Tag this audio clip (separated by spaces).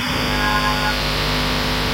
hollow noise